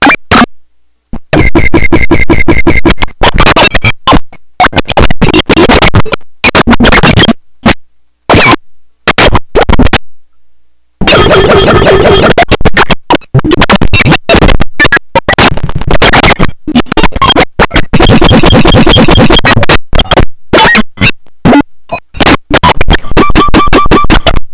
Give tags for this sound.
bending
circuit-bent
coleco
core
experimental
glitch
just-plain-mental
murderbreak
rythmic-distortion

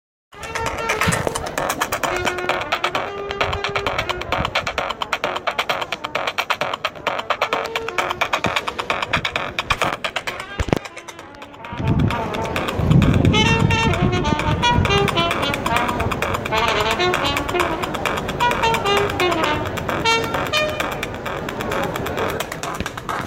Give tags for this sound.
trumpet
techno
stoned
coincidence

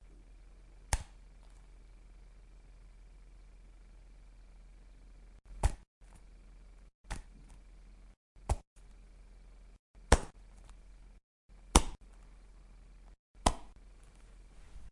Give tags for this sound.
bar
Catch
Lemon

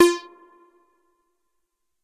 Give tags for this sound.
moog
roland
space
echo
minitaur
lead

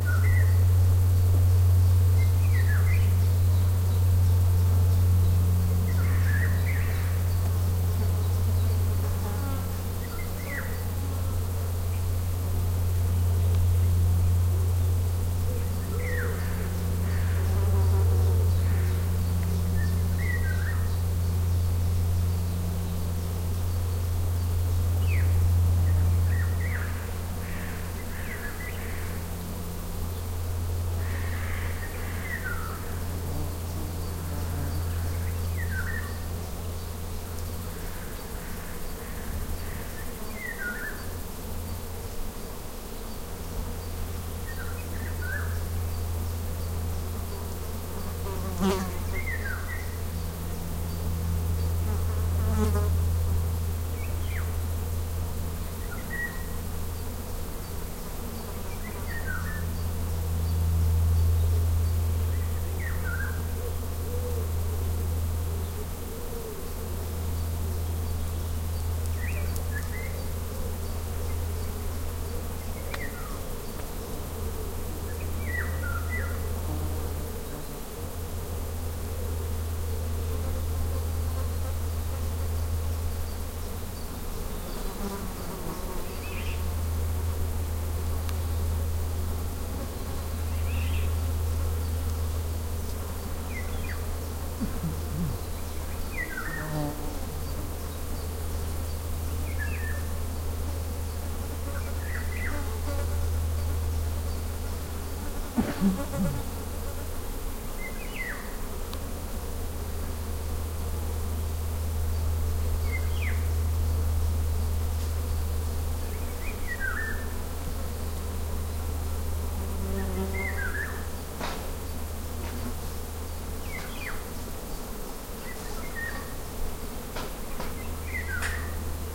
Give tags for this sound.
Field-recording
Forest-sounds
Wood-Pigeon

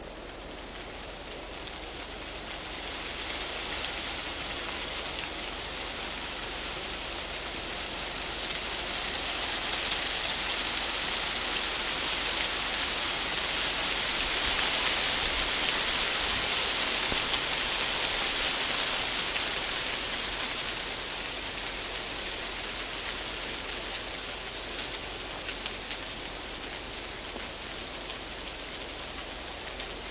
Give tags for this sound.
leaves
tree

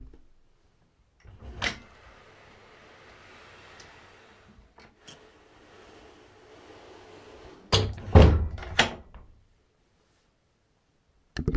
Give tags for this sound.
close
door
hinge
open
wood

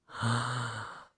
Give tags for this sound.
Creative
Mastered
Free
Edited

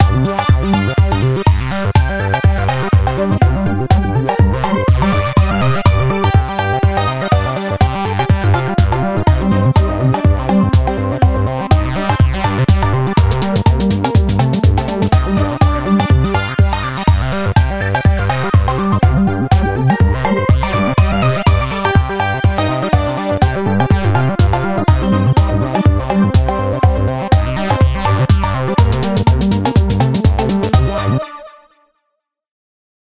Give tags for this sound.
G
ringtone
123bpm
minor
music
loop
ethnic

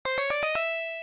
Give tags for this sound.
Item
Game
8bit
Video-Game
8-bit
Collect
Up
level-up
Pickup
level

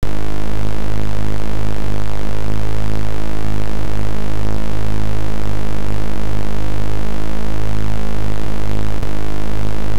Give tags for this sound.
Lead
Synth
Monotron
Oscillater
Sample
Bass

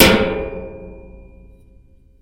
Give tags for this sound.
metal
bang
percussion
sheet
stomp
metallic
ping

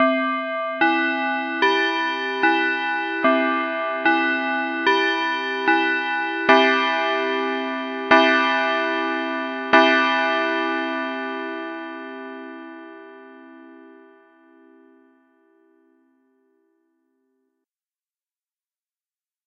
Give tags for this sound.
bell
chime
ring

